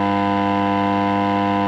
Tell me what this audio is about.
am radio noise short
am-radio, analog